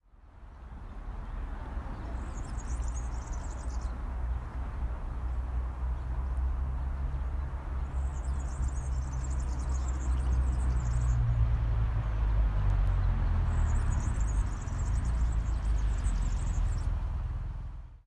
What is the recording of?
Birds sound pájaros
Paisaje sonoro del Campus de la Universidad Europea de Madrid.
European University of Madrid campus soundscape.
Sonido de pájaros
Birds sound
Universidad-Europea-de-Madrid, paisaje-sonoro, UEM, birds, soundscape, pajaros